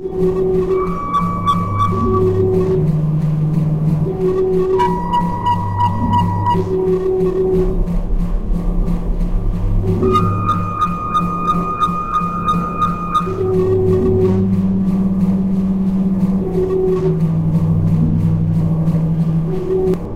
A raw random data noise with effects extracted from computer random data